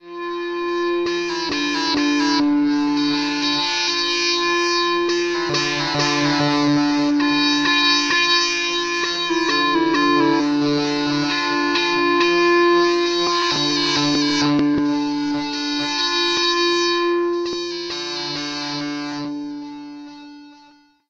QUILTY - Bonechillin' Pads 001
I forgot about these samples, and they were just sitting in the FTP until one day I found them. I erased the hard copies long ago, so I can't describe them... I suppose, as their titles say, they are pads.
ambient, interlude, instrumental, similar-but-different-in-a-way, pad, new-age, light